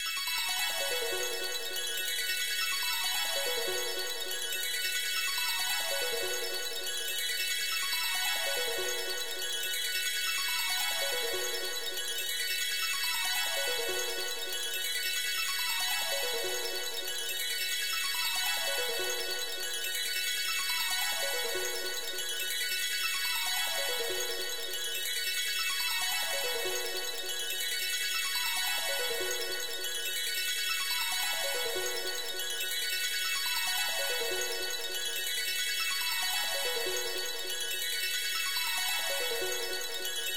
Loop made with my korg.